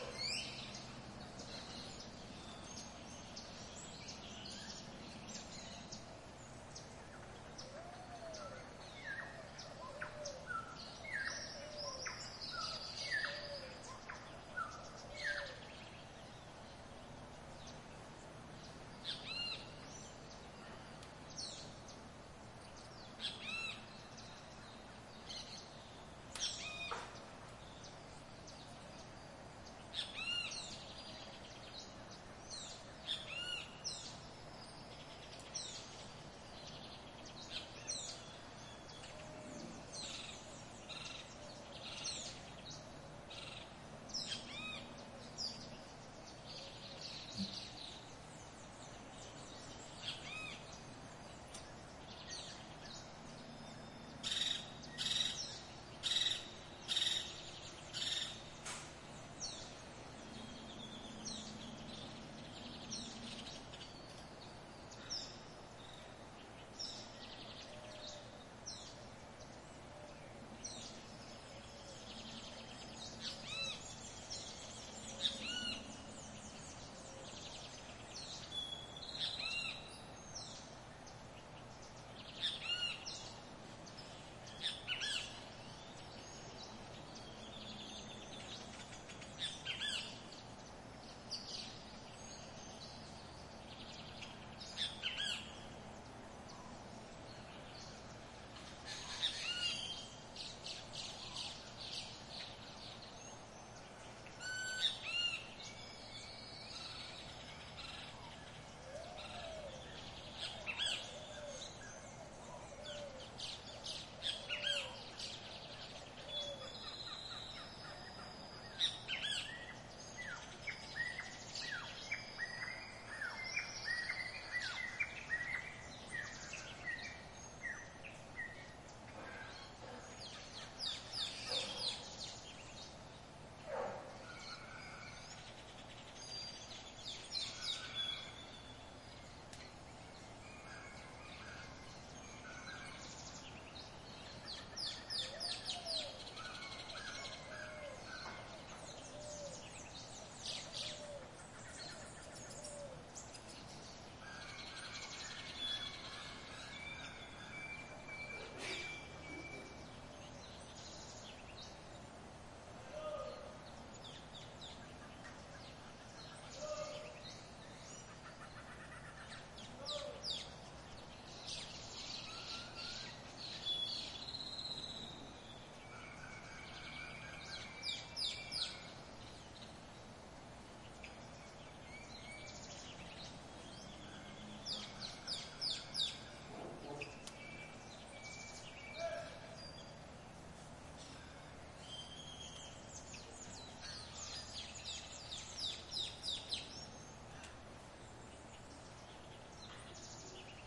Fieldrecording from our balcony in Jaco Beach, Costa Rica. Ambience Sound with a lot of tropical birds.
Recorded with Soundman OKM.
Ambience, Atmosphere, Beach, Costa-Rica, Jaco